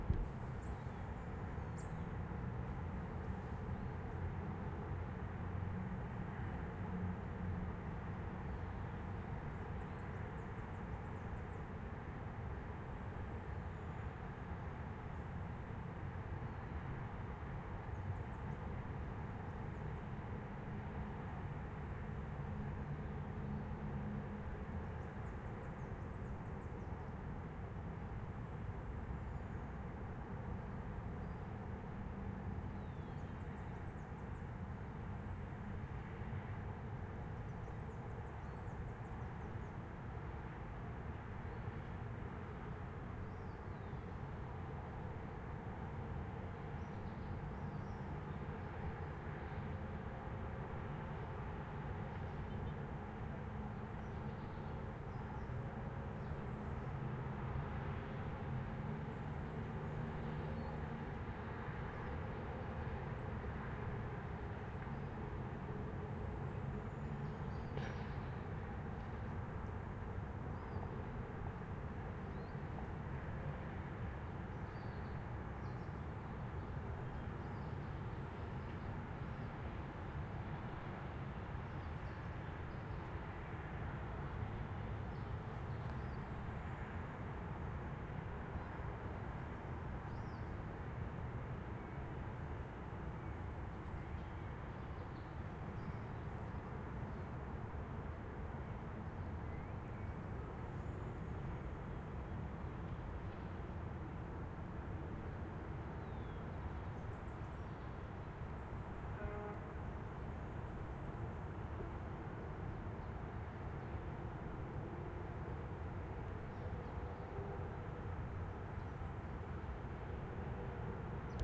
Grabación en la Quebrada La vieja. Bogotá-Colombia
Murmullo urbano desde los cerros en el interior del bosque a 500 mts de la av circunvalar 07:25 a.m.
Field recording from river La Vieja. Bogotá - Colombia
City whispering from the hills in the forest. 500 mts from av. circunvalar 07:25 a.m